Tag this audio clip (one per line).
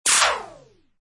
fire
handgun
army
laser
pistol